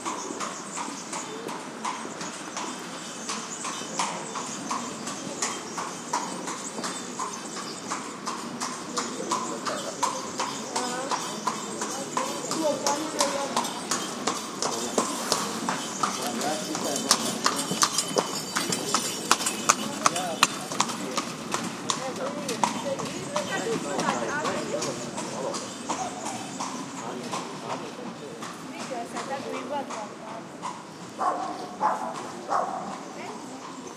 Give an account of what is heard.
20110220 passing.cart
a horse cart passes and people talk in background. Recorded at the Plaza de Jeronimo Paez (Cordoba, S Spain) with PCM M10 recorder internal mics